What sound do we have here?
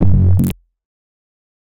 Abstract, Noise, Industrial